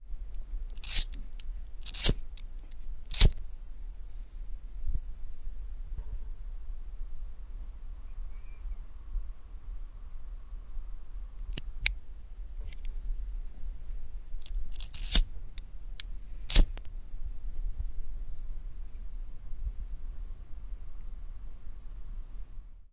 Clicking a lighter. Close record.
fire, lighter, zipp